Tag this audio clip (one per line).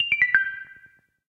app
button
giu